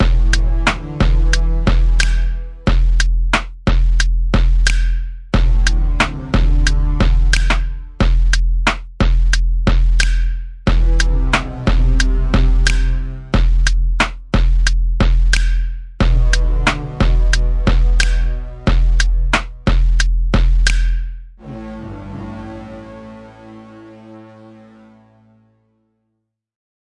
Five Shaolin Masters Intro
An intro I made in Ableton Live 10 Lite, using my Alesis Recital Pro and iRig Keys midi devices. Might make a good Podcast intro. I just made it because it's my favourite classic kung-fu movie. Drums are "Grit Life Kit" in AL10L.